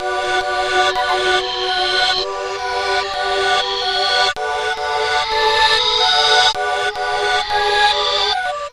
8bit110bpm-11
The 8 Bit Gamer collection is a fun chip tune like collection of comptuer generated sound organized into loops
110, 8, 8bit, bit, bpm, com, loop